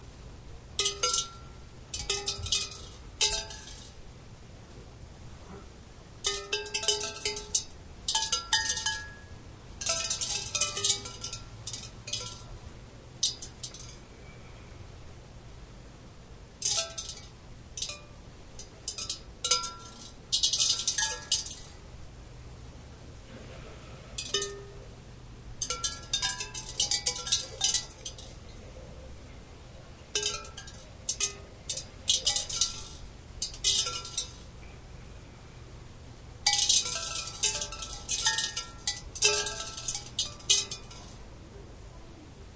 the sound of me dropping rice grains (or was it lentils?) onto a steel drum.